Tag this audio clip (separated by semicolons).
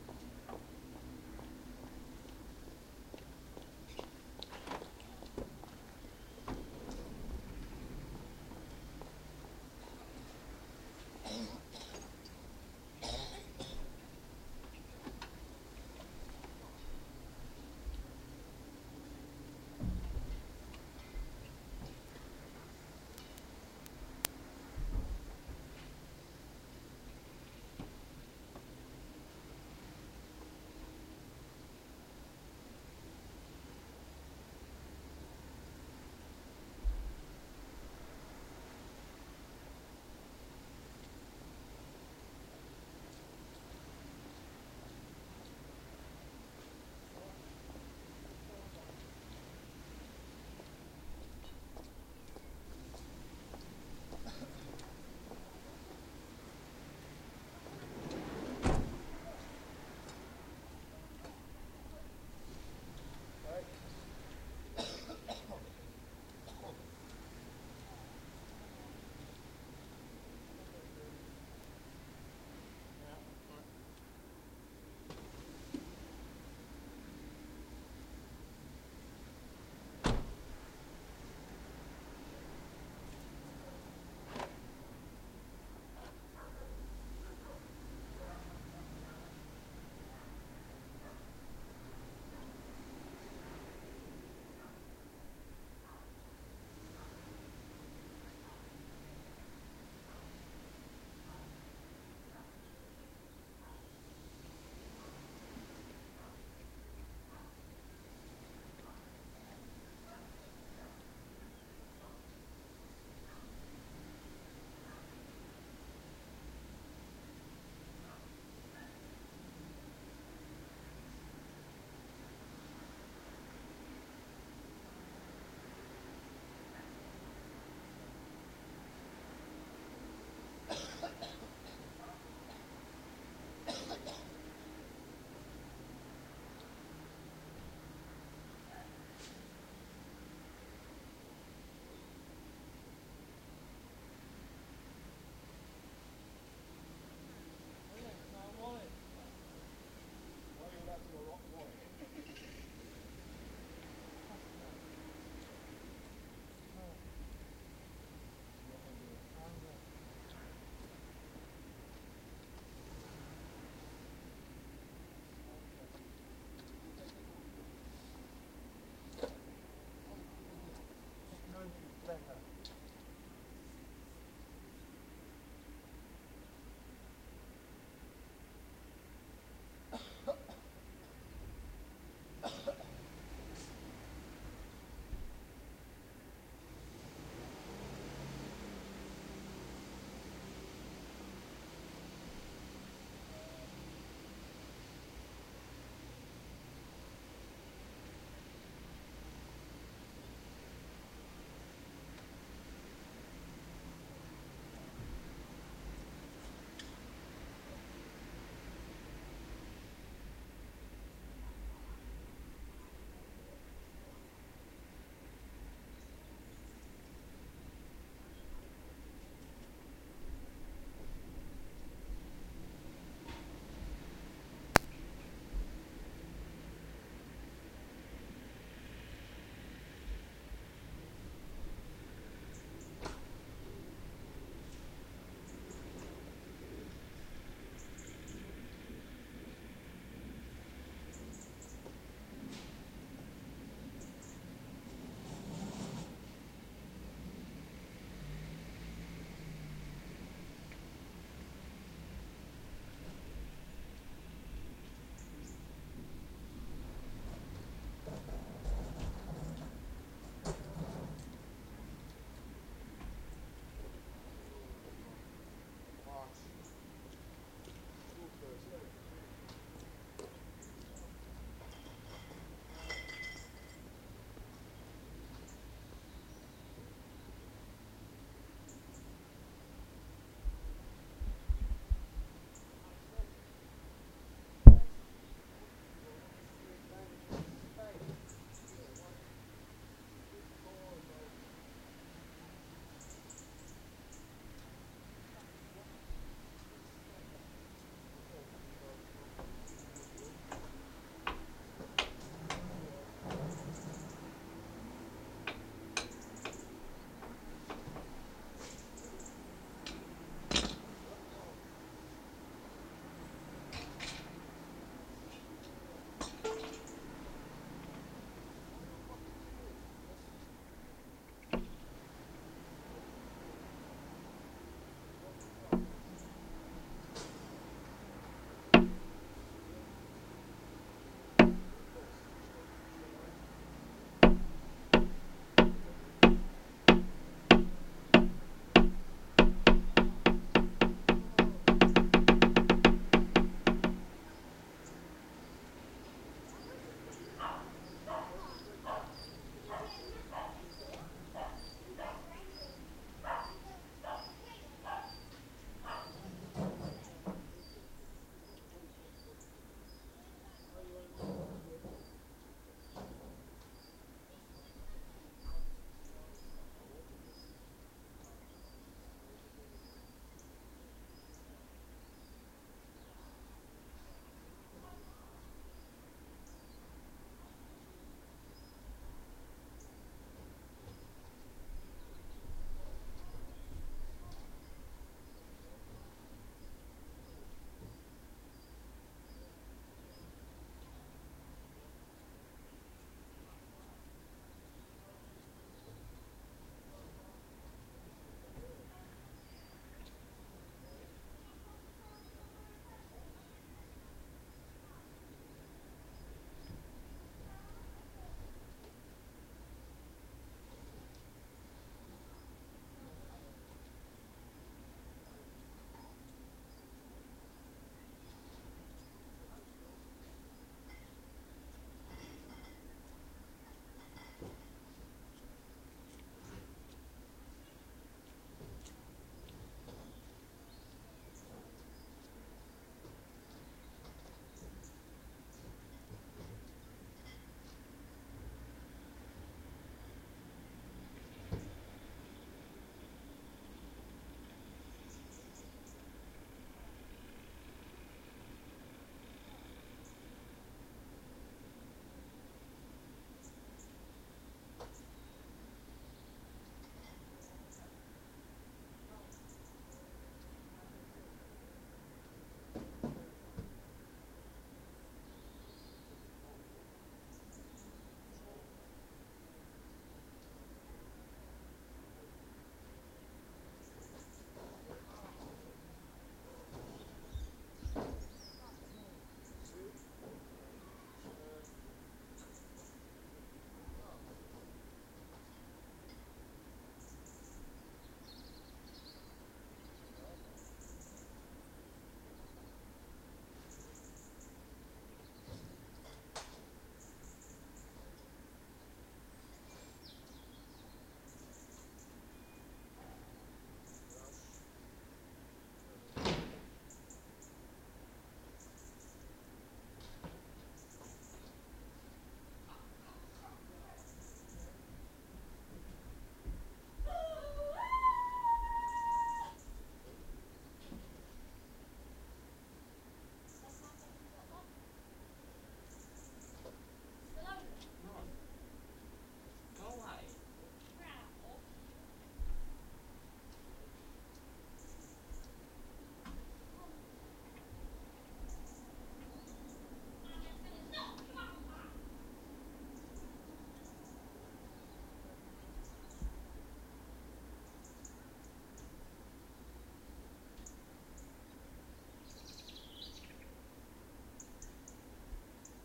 general-noise; donana; ambience; marshes; background-sound; white-noise; summer; south-spain; nature; field-recording; birds; ambient; forest; atmo; atmos; soundscape; bird; frogs; insects; background; atmosphere; birdsong; ambiance; spring; crickets; night